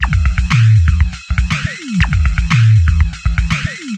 Thank you, enjoy
drum-loop, drums